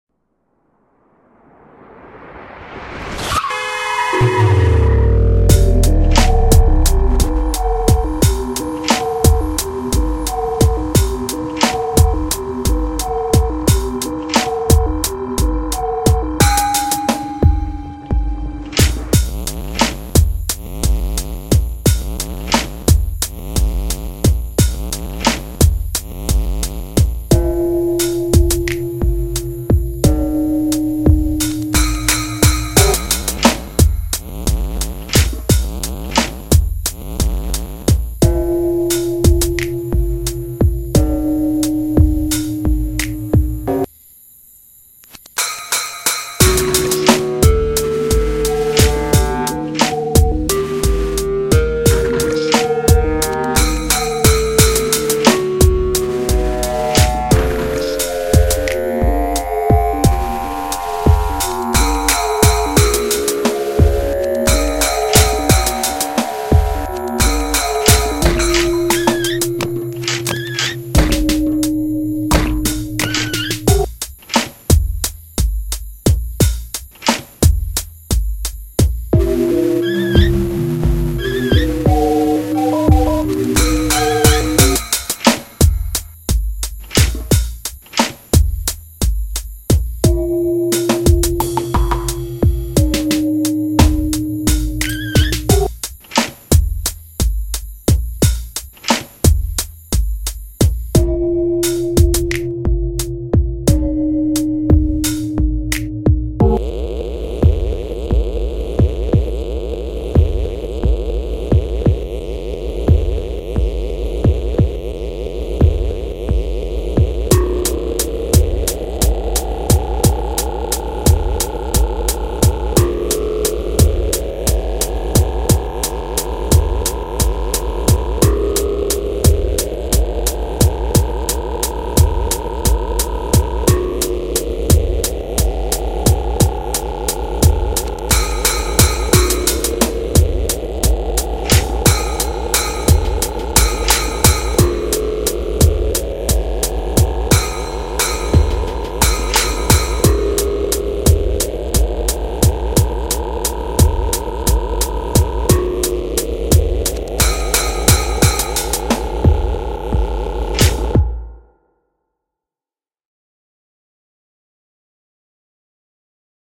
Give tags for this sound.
sick
cry
screamo
beatz
rap
car-accident
grand-theft-auto
industrial
death-metal
car-crash
hxc
no-shame
production

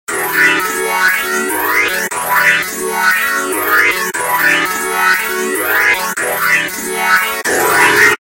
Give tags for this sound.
guitar
processed
seqence

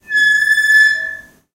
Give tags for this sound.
close
door
gate
house
metal
open
squeak

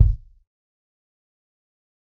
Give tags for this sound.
kick
pack
punk
realistic
tony

